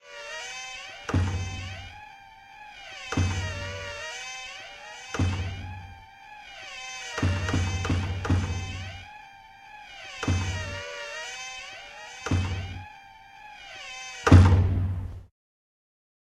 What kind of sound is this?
DOIZY HADRIEN 2018 2019 GatesOfTheUnderworld
You're in a dark and gloomy corridor. As you walk, you can hear the sound of a thousand souls screaming at the same time, in the same creepy way from every directions. Each door you pass is closing and opening until you reach the last one.
Well, no worries, I did not go to hell for that. I only recorded a screeching door at uni and looped the sound. On top of that, I added the sound of the same door closing to create some kind of rhythm. You may hear gunshots, a monster pounding on a wall or just a door closing. Whatever floats your boat.
Code typologie de Schaeffer : V
Masse :sons cannelé
Timbre harmonique : Son strident, acide...
Grain : plutôt lisse
Allure : effet de pseudo vibrato qui peut être dû au à la boucle du même son et des fondus qui permettent de les liés.
Dynamique : Attaque douce mais avec une gradation qui suit
Profil mélodique : variation serpentine
evil, horror, monster, screechingdoor, underworld